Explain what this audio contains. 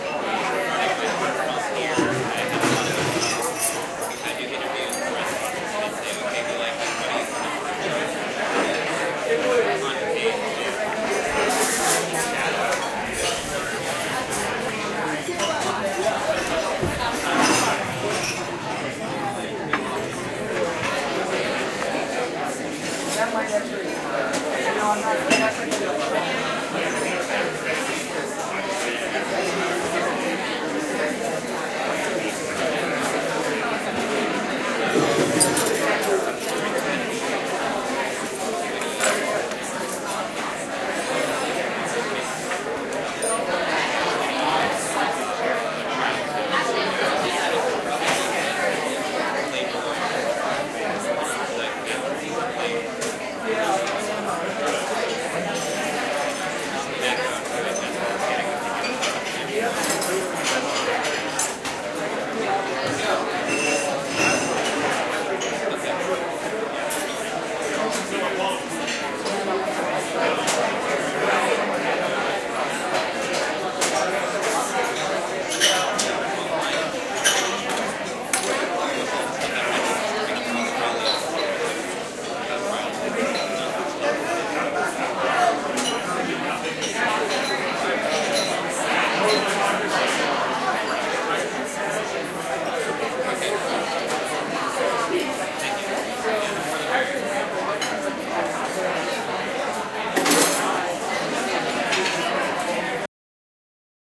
restaurant amb busy noisy
Busy lunchtime at a popular NYC restaurant. Bright and lively. Recorded on Olympus LS-10
ambiance, atmosphere, busy, loud, restaurant